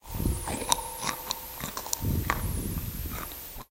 EatingCereal MasticandoCereal
sonido crujiente, comiendo cereal / crunchy eating cereal
cereal, chew, chewing, chomp, crunch, crunchy, eating